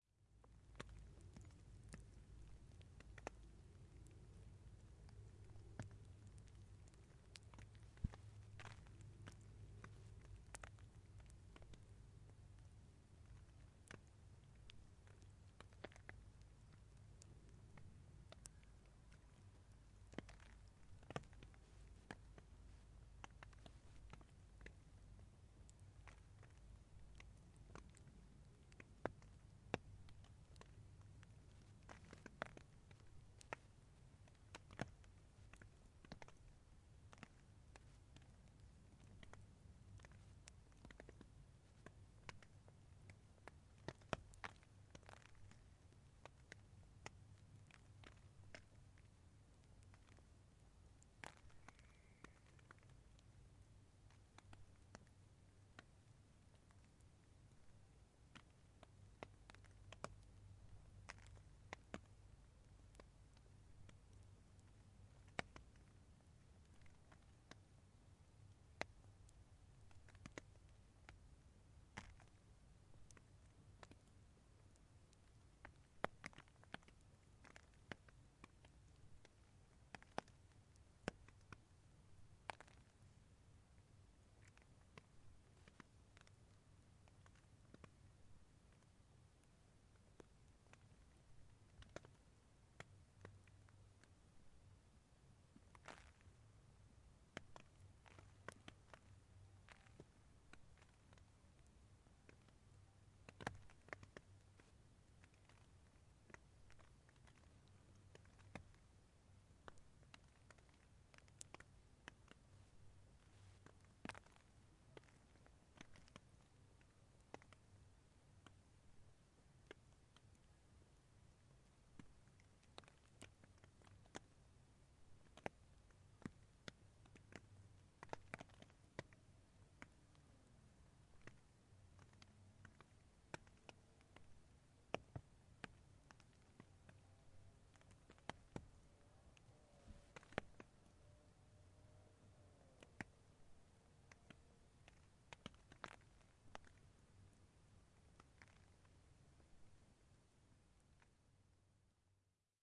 rain on tent 01
Drops of rain on the surface of a tent, camping in France